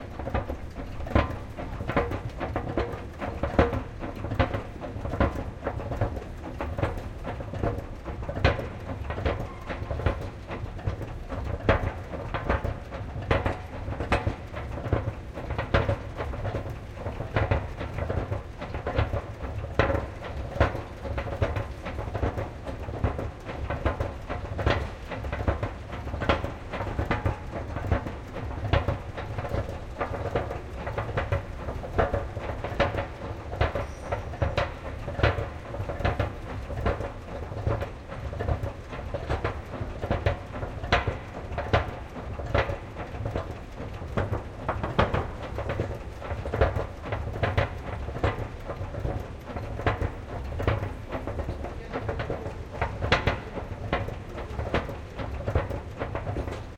escalator-close
Close-up recording of an escalator.
city, close-up, loop, machine, noise